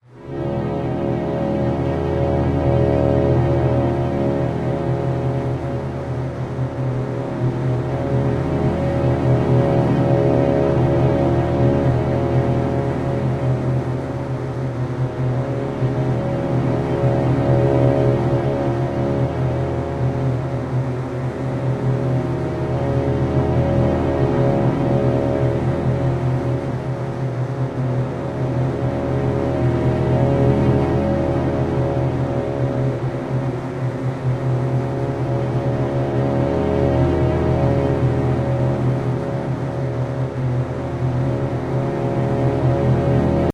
Angry Drone 5
An ambient track that sounds a bit angry. Can be cut down to fit whatever length needed, and is simple enough that a looping point could likely be found fairly easily if needed longer.